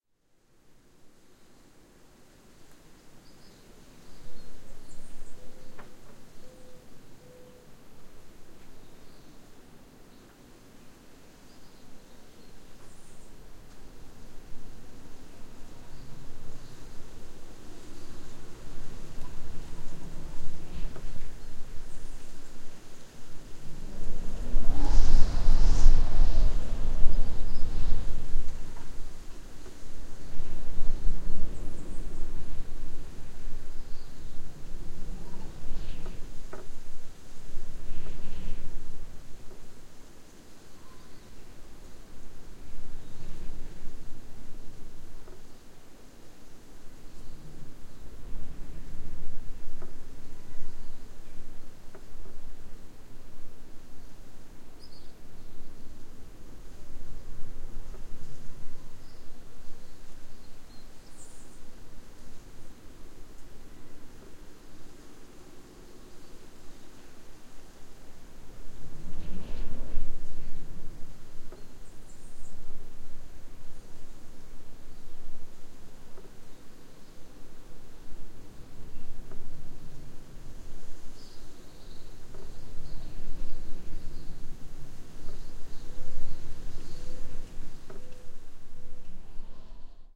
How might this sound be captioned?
StCroix wind
A windy afternoon in St Croix. Birds singing, wind blowing through house.
room-tone, caribbean, ambience, wind, birds, air, moan, atmosphere, stcroix, creak